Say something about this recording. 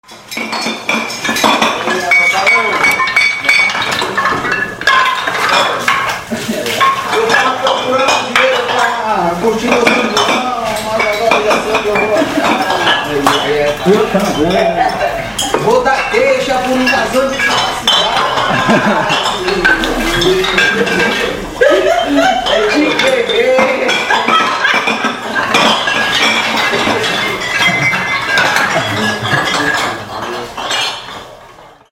Garrafas sendo lavadas para produção de licor no período pré-São João.
Data: 10/jun/2016
Horário: 14:40
Gravado com gravador de mão Sony PX820
Som captado por: Reifra Araújo e Larissa Azevedo
Este som faz parte do Mapa Sonoro de Cachoeira
Bottles being washed for liquor production at Cachoeira City
Date: Jun/10/2016
Time: 2:40 P.M.
Recorded with handy recorder Sony PX820
Sound recorded by: Reifra Araújo and Larissa Azevedo
This sound is part of the Sound Map de Cachoeira
Bottles, garrafas, gua, licor, Liquor, Voices, Vozes, Water